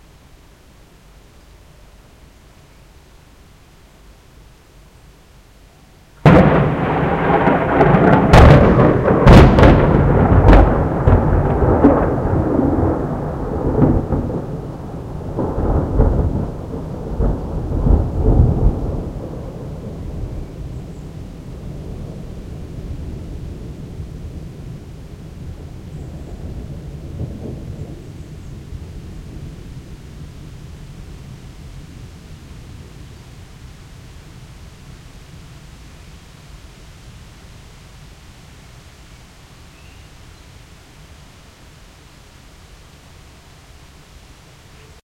One of the thunderclaps during a thunderstorm that passed Amsterdam in the morning of the 16Th of July 2007. Recorded with an Edirol-cs15 mic. on my balcony plugged into an Edirol R09.
field-recording, rain, noise, thunderstorm, nature, thunder